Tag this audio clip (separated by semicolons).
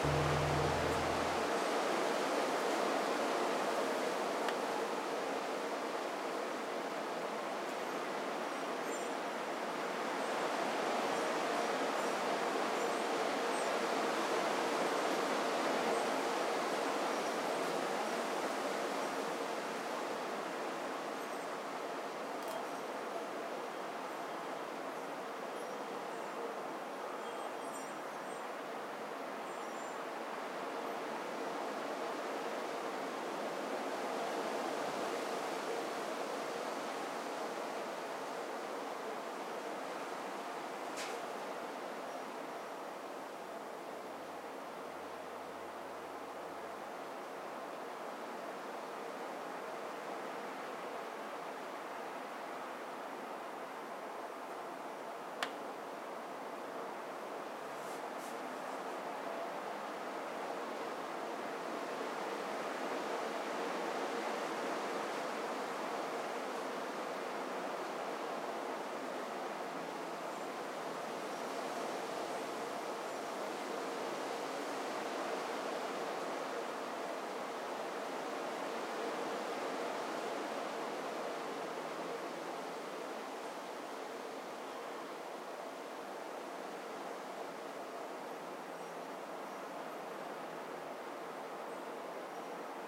bell-like-sound; costa-rica; tinkling